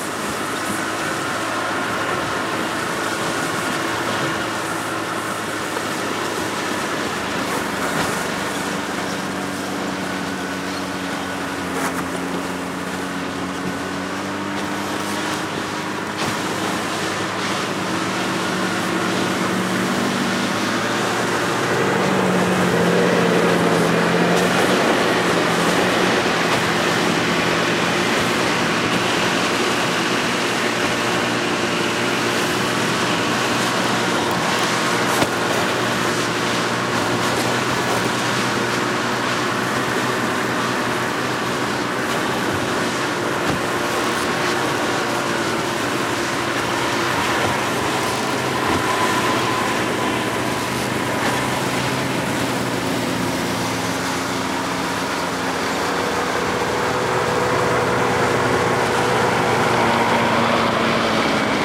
snowmobiles pull away constant noise